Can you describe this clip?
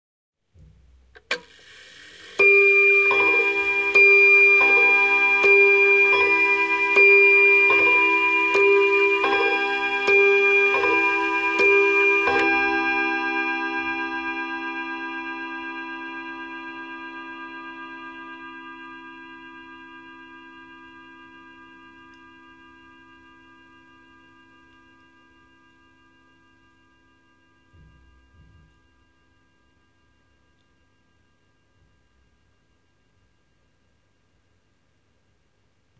Antique table clock (probably early 20th century) chiming seven times.